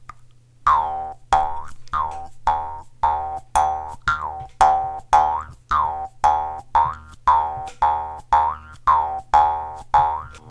jaw harp
jaw-harp recorded on an Audio technica AT2020 USB
it's the thing Snoopy plays in the bus.
boing, bouncing, cartoon, jaw-harp, spring